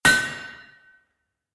Large Anvil & Steel Hammer 1
A stereo recording of a single strike with a steel hammer on a piece of hot steel on a large anvil mounted on a block of wood. Rode NT4 > FEL battery pre amp > Zoom H2 line in.
clang, metal, steel-hammer, tapping